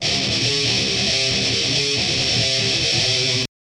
THESE LOOPS ARE ALSO 140 BPM BUT THERE ON A MORE OF A SHUFFLE TYPE DOUBLE BASS TYPE BEAT OR WHAT EVER YOU DECIDE THERE IS TWO LOOP 1 A'S THATS BECAUSE I RECORDED TWO FOR THE EFFECT. YOU MAY NEED TO SHAVE THE QUIET PARTS AT THE BEGINNING AND END TO FIT THE LOOP FOR CONSTANT PLAY AND I FIXED THE BEAT AT 140 PRIME BPM HAVE FUN PEACE THE REV.
groove, guitar, hardcore, heavy, loops, metal, rock, rythem, rythum, thrash